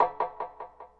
striking violin with a bow thru Line 6 delay pedal